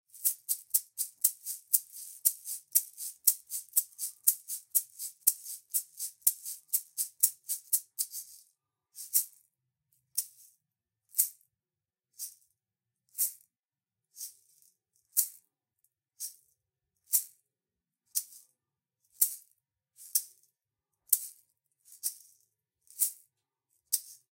Salsa Eggs - Brown Egg (raw)
These are unedited multihit rhythm eggs, and unfortunately the recording is a tad noisy.
rhythm, percussion, samples, latin, multi-hit, plastic, egg